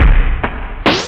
beat yoyo
Experimenting with beats in analog x's scratch instead of vocal and instrument samples this time. This is a beat with a reverse or turn around type flow.
scratch, dj, drum, percussion, loop, vinyl, scratching, jungle